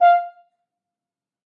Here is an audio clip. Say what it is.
One-shot from Versilian Studios Chamber Orchestra 2: Community Edition sampling project.
Instrument family: Brass
Instrument: F Horn
Articulation: staccato
Note: E#5
Midi note: 77
Midi velocity (center): 20
Microphone: 2x Rode NT1-A spaced pair, 1 AT Pro 37 overhead, 1 sE2200aII close
Performer: M. Oprean
midi-note-77, f-horn, single-note, staccato, vsco-2, multisample, esharp5, midi-velocity-20, brass